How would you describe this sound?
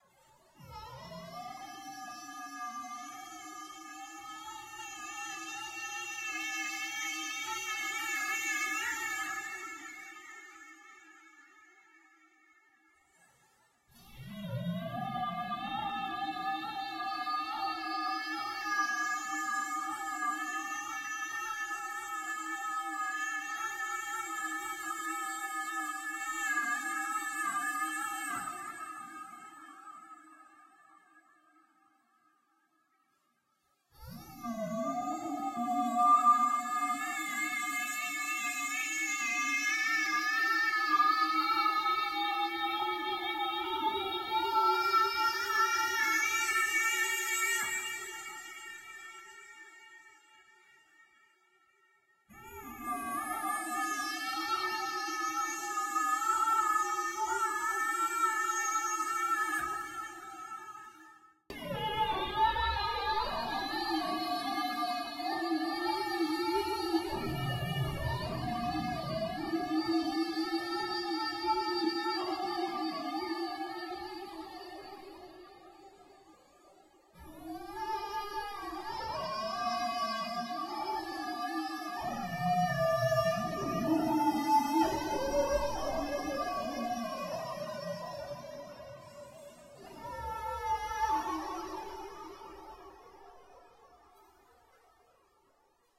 strange sound design, . Second step of processing of the bunker singing sample in Ableton. Added Waves's R360 (binaural reverb, or I think 5.0 reverb ).